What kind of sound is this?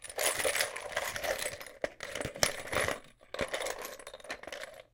Glass-Handling
Handling broken glass, recorded with Neumann TLM103
pieces, handling